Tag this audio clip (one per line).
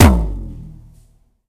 percussion
drums
kit
drum
tom